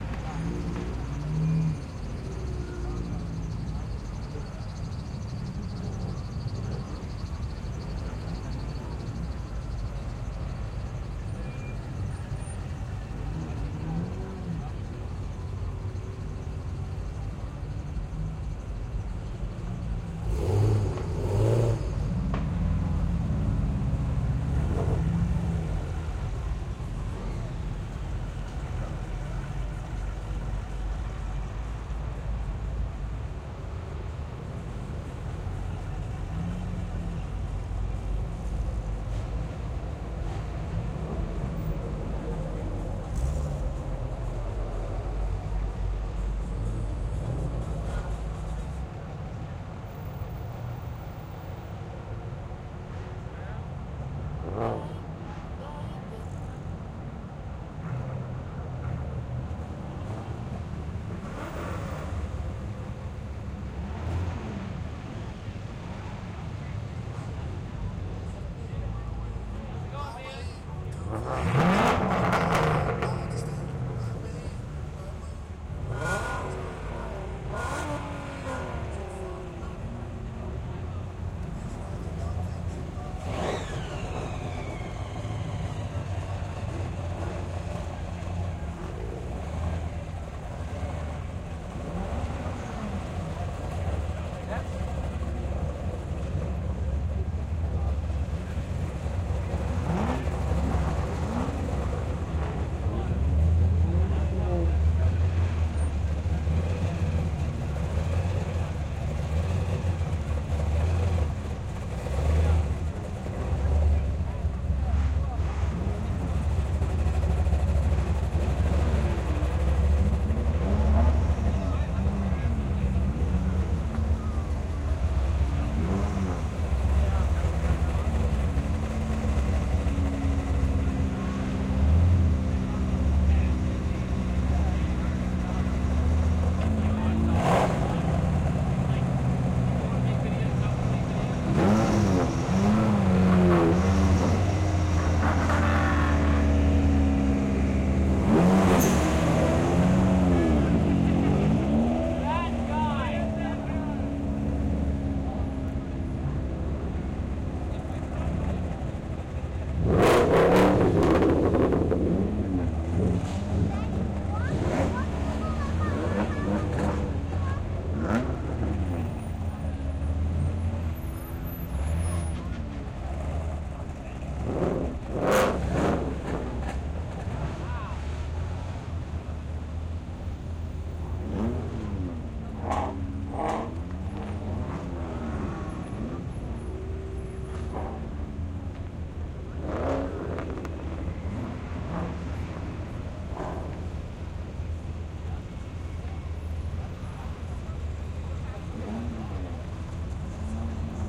Carshow-cars-revs-crowd-004
Outdoor recording of cars going by at a car show. Some crowd and ambience with engine revs. Recorded with onboard mics of a Tascam DR-07 with a Rode Dead Kitten over it.
ambience, automobile, car, crowd, engine, exterior, field-recording, outdoors, people, revs, show, vehicle